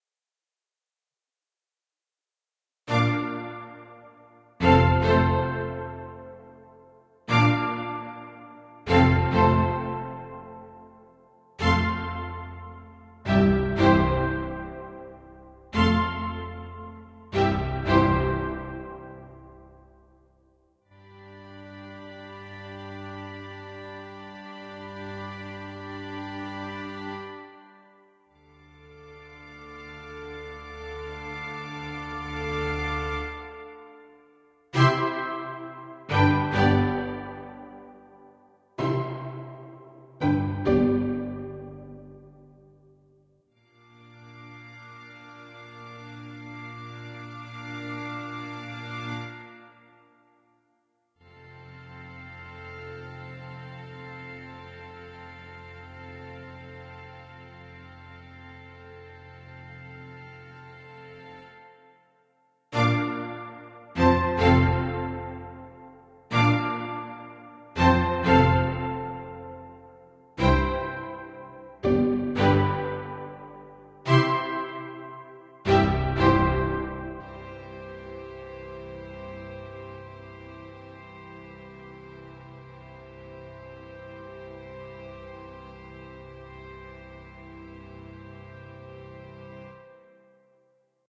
My Song 7
Ya just gotta love Apples GarageBand, where else can you have a whole strings section right at your finger tips...
cimematic, cinema, dramatic, Law-n-Orderish, Symphony